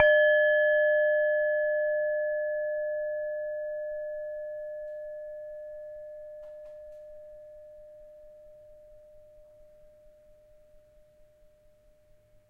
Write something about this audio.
E flat Tibetan singing bowl struck
Struck of an E flat Tibetan singing bowl.
singing-bowl, tibetan-bowl, tibetan-singing-bowl